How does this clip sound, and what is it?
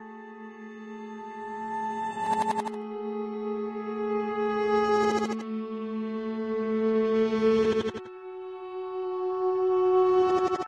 EVM grand piano sounding nice
reversed-piano, melodic